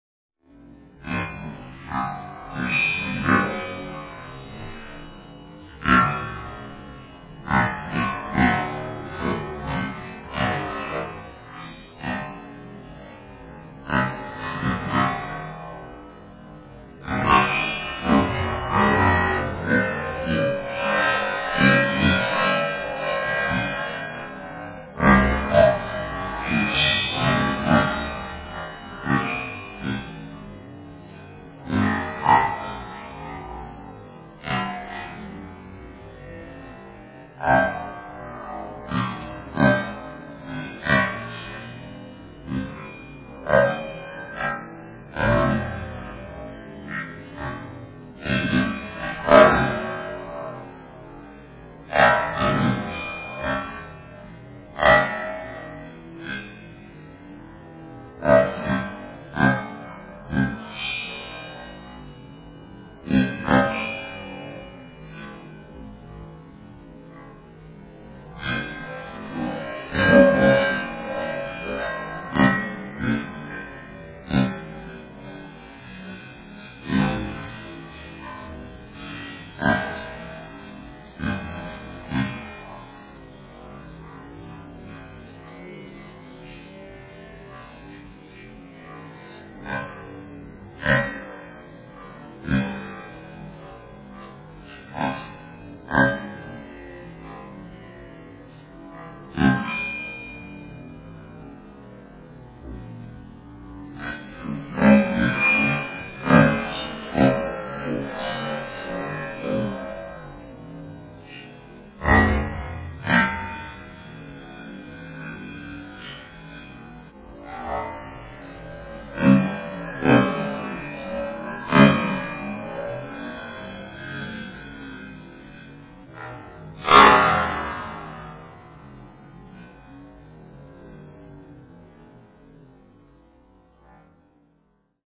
POPCORN EVEN SLOWER(FS)
I got a request to make the original file even slower. So, here it is. Recorded with my Yamaha Pocketrak. Thanks. :^)
Popper, Popping, Slowed, Popcorn